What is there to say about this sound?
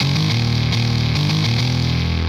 105 Necropolis Synth 04
heavy gut synth